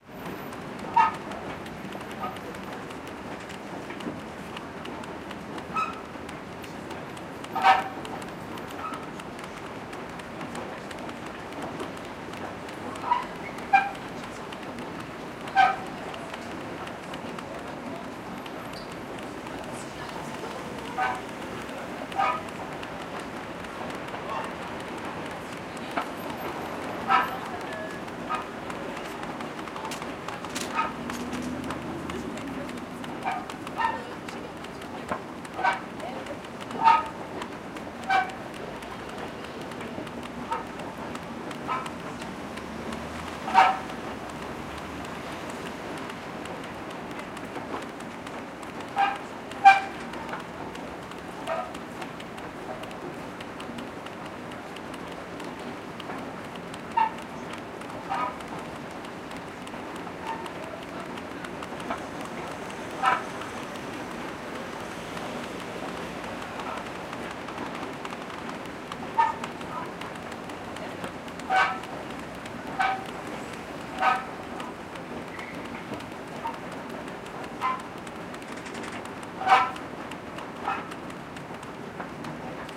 Screeching subway entrance escalator. You also hear the handle moving. Made me think of sounds in an abandoned industrial site. This is late night so not many people around anymore. Recorded with a Nagra Ares-M and the Nagra NM-MICS-II stereo mic.